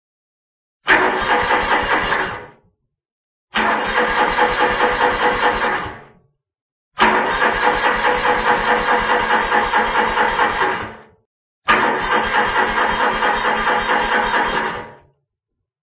Tractor won't start
A tractor turn over sound I made by starting (or trying to start) a tractor in very cold weather. Recorded on my Walkman.
ignition, start, tractor, turn-over, wont